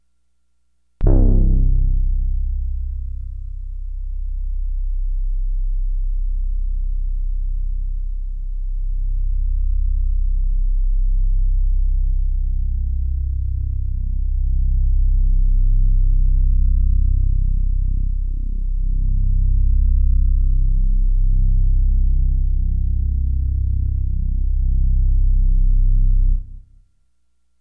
Low brasslike drone